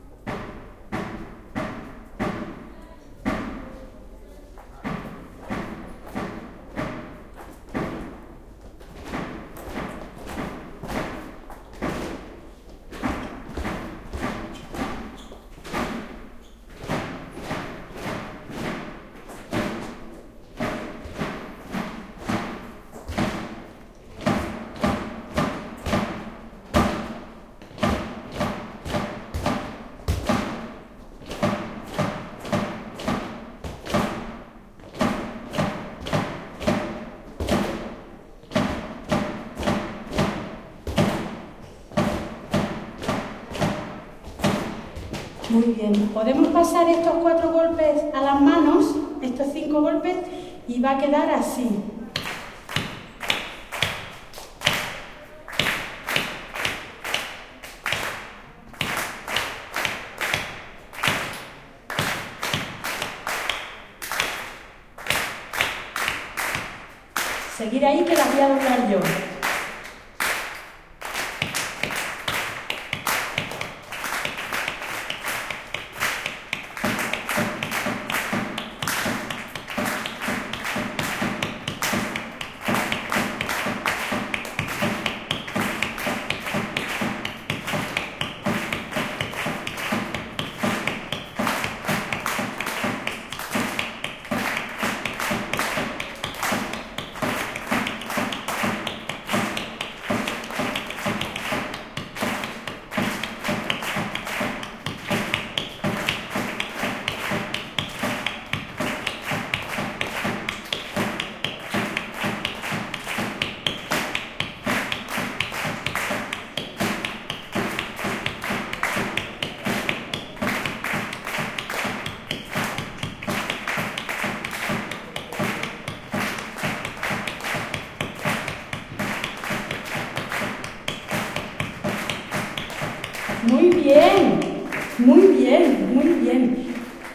a female voice instructs on a basic flamenco rhythm, audience clap hands trying to keep pace (without much success at first, better near the end).Edirol R09 internal mics